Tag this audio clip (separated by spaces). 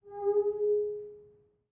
bathroom cleaning glass mirror resonance